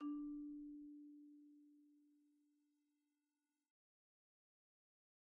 Sample Information:
Instrument: Marimba
Technique: Hit (Standard Mallets)
Dynamic: mf
Note: D4 (MIDI Note 62)
RR Nr.: 1
Mic Pos.: Main/Mids
Sampled hit of a marimba in a concert hall, using a stereo pair of Rode NT1-A's used as mid mics.
hit, idiophone, instrument, mallet, marimba, one-shot, orchestra, organic, percs, percussion, pitched-percussion, sample, wood